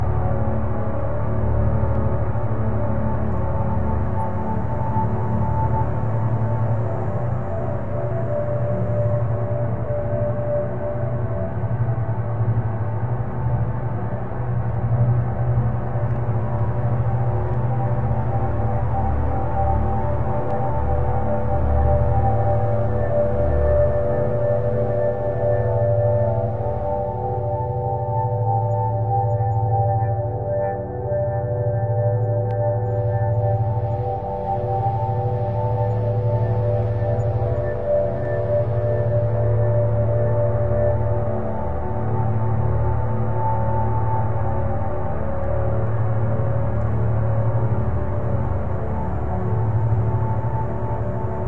A looping background ambient sound, a little bit dark, a little bit industrial. A remix of a couple of my sounds done with Gleetchlab.